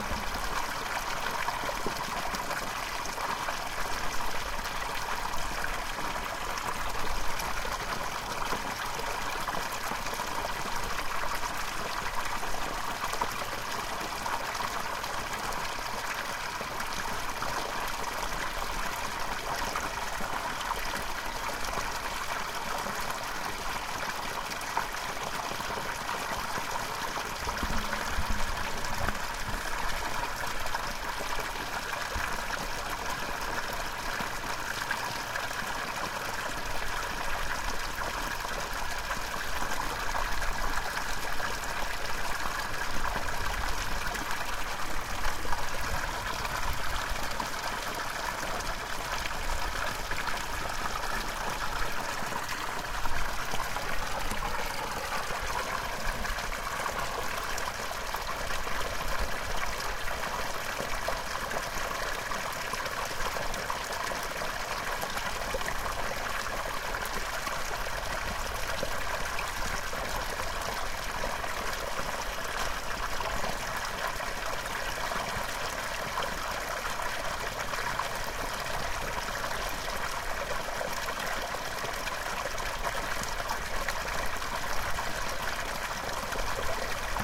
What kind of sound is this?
Water Gush from Culvert